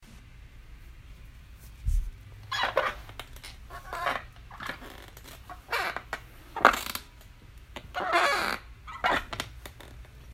Squeaky Chair
A chair creaking with weight applied to it
wooden, chair, sitting, squeaky, creak, wood, squeak, creaky, sit, seat